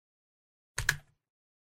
Keyboard Click
Enter button clicked.
computer, enter, click, button, press